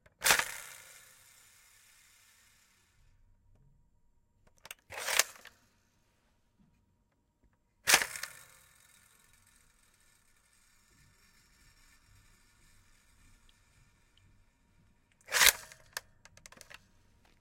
X-Shot Chaos Meteor Magazine Sounds
X-Shot Chaos Meteor Shooing and Reloading. This is the noisiest Nerf Gun I own, it performs similar to the Rival Series but the sounds are superb! Hear the spring going on forever!
Ball, Blaster, Chaos, Dart, Foam, Gun, Nerf, Nerf-Gun, Pistol, Plastic, Rifle, Rival, Shooting, Shot, Toy, Xshot, X-Shot